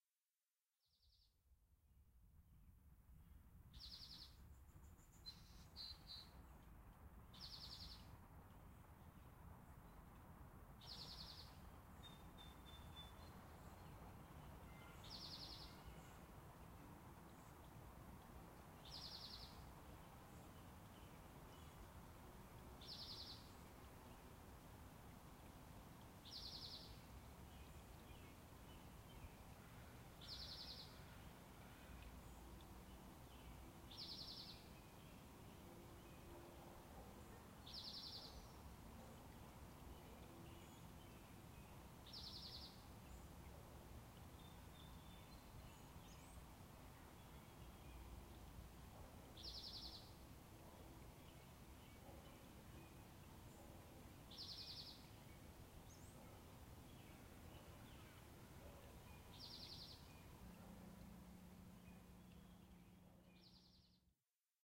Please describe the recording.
Suburban Birds 2
Two birds singing in a suburban park with soft, distant traffic noise.
Recorded with an H4n recorder and Shure SM63LB omnidirectional mic.
city, birds, suburban, ambient